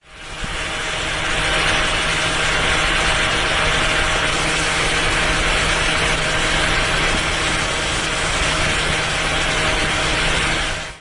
26.08.09: the fan from The U Honzika Pub on Taczaka street in the center of Poznan.